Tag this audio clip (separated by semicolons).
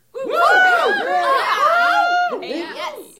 audience; cheer; cheering; crowd; group; studio; theater; theatre; woo